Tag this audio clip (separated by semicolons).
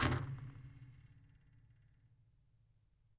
acoustic; metalic; percussive; rub; scrape; spring; wood